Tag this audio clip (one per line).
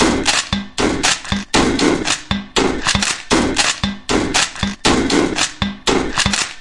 beats
beat
idm
loops
percussion-loop
drumbeat
experimental
loop
drum-loop
quantized
breakbeat